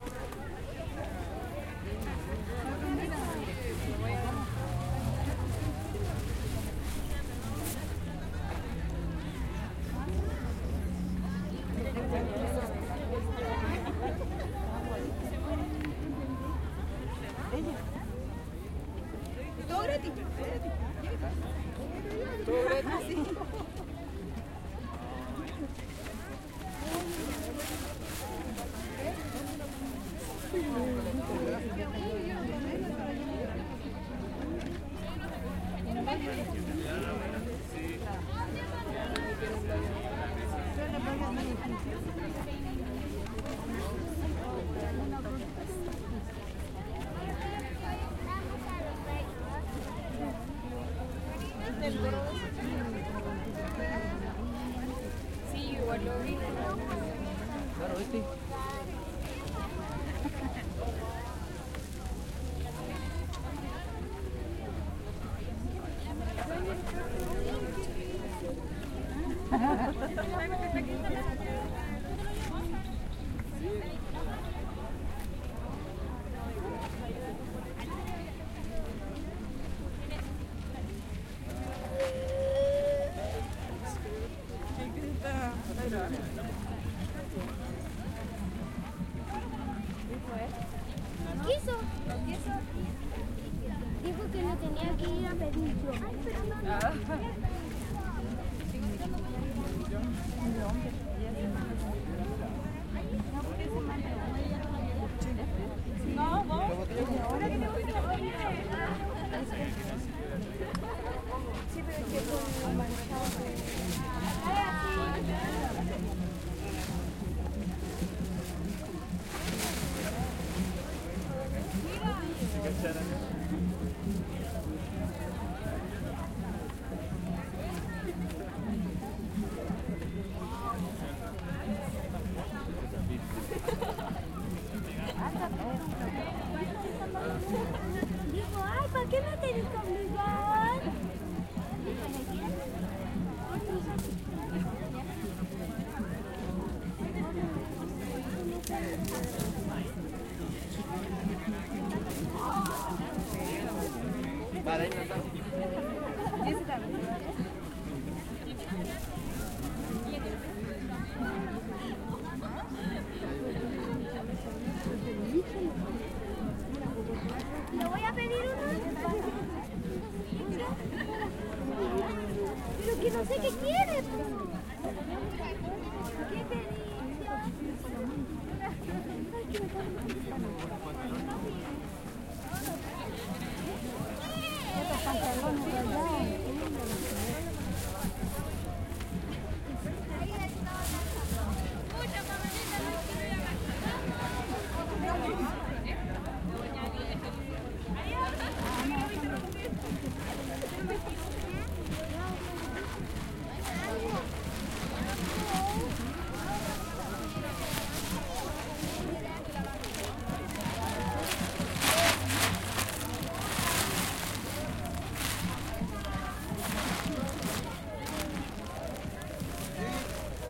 gratiferia 01 - quinta normal
Gratiferia en la Quinta Normal, Santiago de Chile. Feria libre, sin dinero ni trueque de por medio. 23 de julio 2011.
chile, gratiferia, market, normal, quinta, santiago, trade